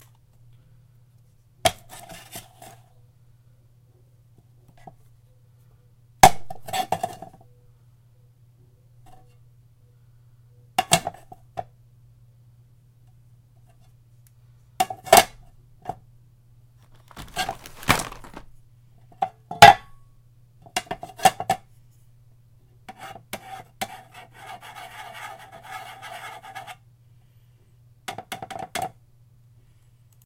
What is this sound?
metallic scratches an rubs
Someone handling something metal.
matallic, matel, rub, scratching